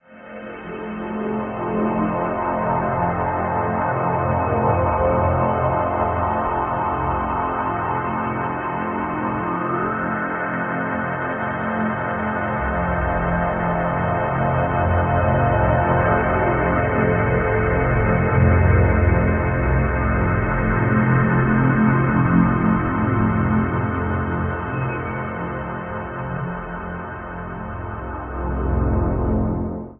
Dark ambient drone created from abstract wallpaper using SonicPhoto Gold.